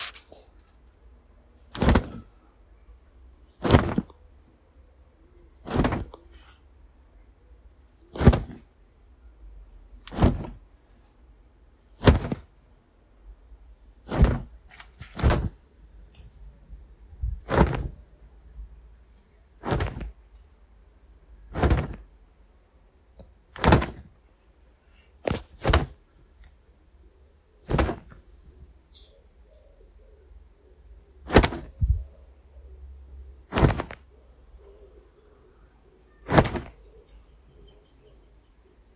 Fabric flaps
Shaking the dust out of a tshirt multiple times. Could also be used for a video game character landing on the ground, or for wings or flying as a flapping sound effect. Recorded on iPhone.
π —Ÿπ —œπ —–π —˜π —‘π —¦π —˜:
π —•π —˜π —™π —’π —₯π —˜ 𝗬𝗒𝗨 π —šπ —’:
β¬‡οΈŽ Take a quick break! Try my free game! β¬‡οΈŽ
Β Animated gif of my game
remove, cloth, fabric, rustle, shirt, shake, clothes, land, swoosh, wing, laundry, fold, speed, velocity, impact, flap, clothing, high, fast, whoosh, dust